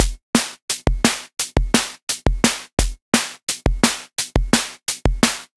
Monotron->OD808->Filter Queen->Ensemble